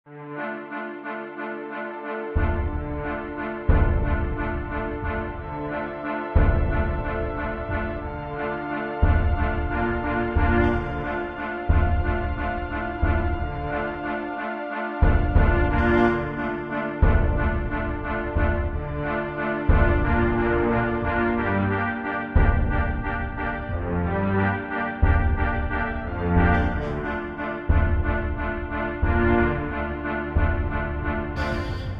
Loop Pirates Ahoy 01
A music loop to be used in fast paced games with tons of action for creating an adrenaline rush and somewhat adaptive musical experience.
music, game, videogame, loop, gamedev, indiegamedev, videogames, gamedeveloping, gaming, war, music-loop, victory, Video-Game, games, indiedev, battle